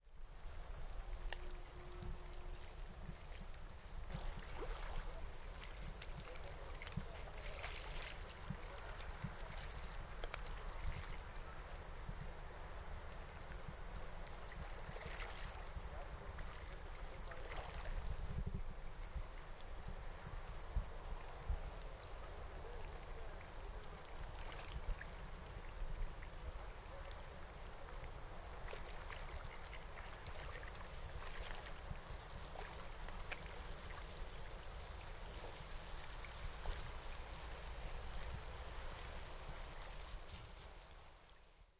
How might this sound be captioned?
Field recording of a lake in Ilmenau, Germany.
Recording Technique : M/S